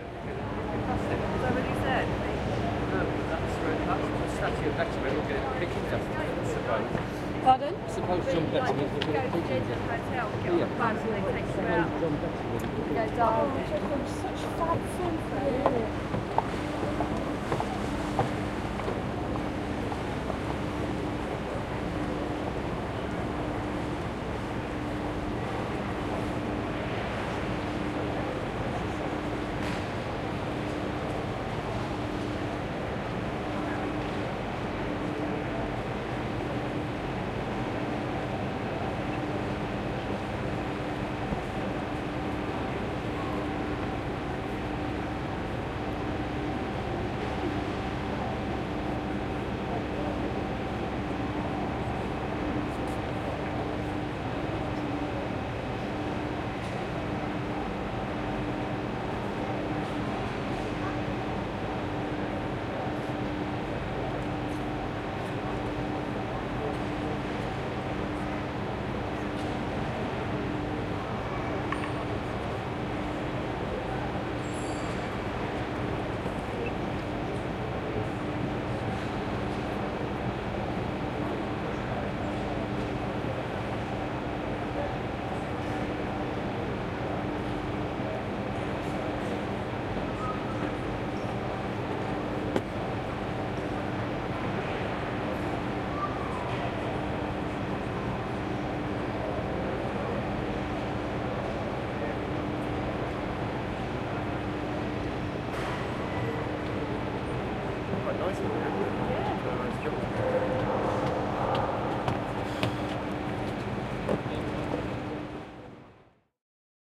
808 St Pancras ambience
The general sounds and ambience of a large station - in this case London St Pancras, now beautifully refurbished and with a direct Eurostar connection to Paris and beyond. Announcement of delays on the Picadilly Line.